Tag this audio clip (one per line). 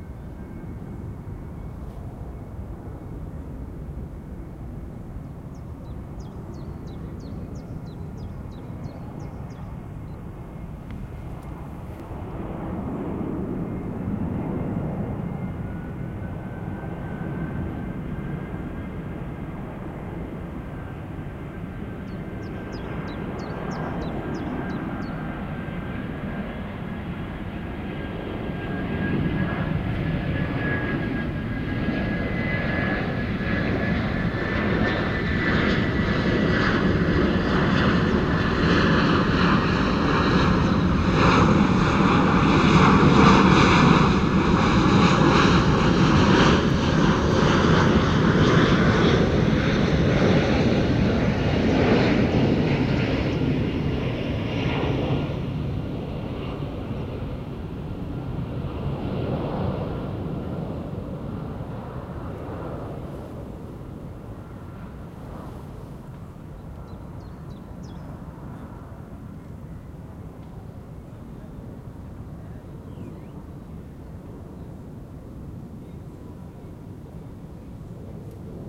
A319
airbus
aircraft
airplane
jet
landing
passenger
plane